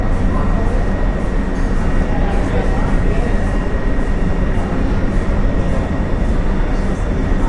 Subway Inside Train Noise
field-recording, inside, train